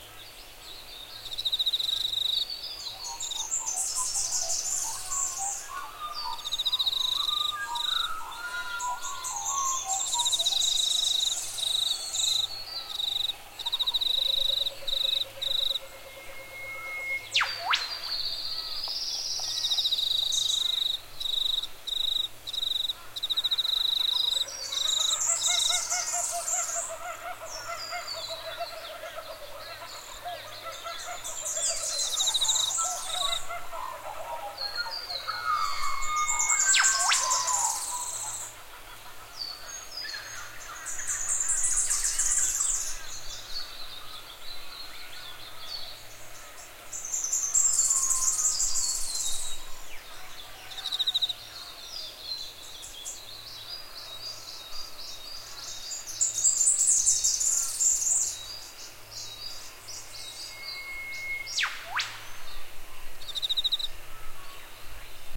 Kookaburras and other birds of Dorrigo Rain Forest-NSW-Aust
Field Recording in Dorrigo World Heritage National Park, NSW, Australia. 5.30 am dawn chorus.
Australian-birds, field-recording, Kookaburras